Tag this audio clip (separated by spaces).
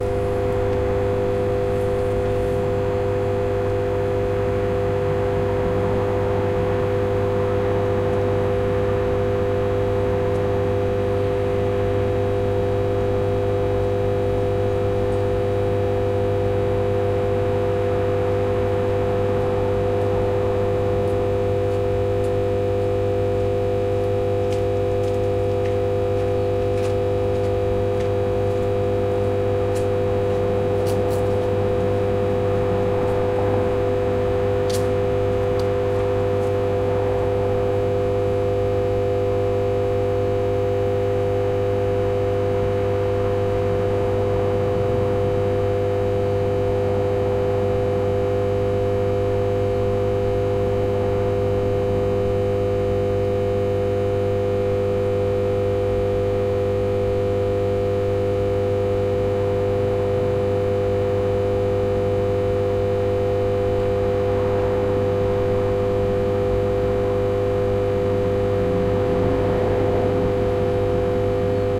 noise electrical-substation substation electrical hum